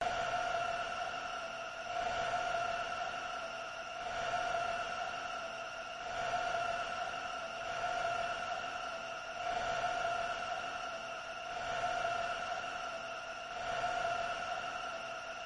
Sound of loading a save-state